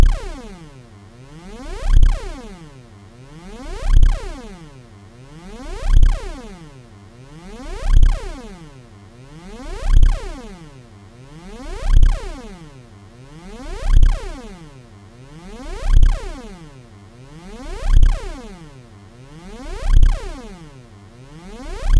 SciFi Alarm
Warning! Warning! Science-fiction is occurring! Repeat: science-fiction is occurring! Please space-evacuate the space-laboratory to avoid science-fiction space-radiation!
Created in Audacity.
danger, electronic, future, futuristic, laser, science-fiction, sci-fi, scifi, signal, spaceship, space-war